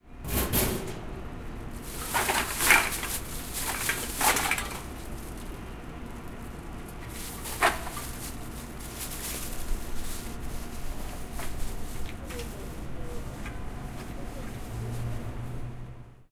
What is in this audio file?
0321 Trash can
Man taking the trash bag from the bin.
20120620
korea, field-recording, seoul, bin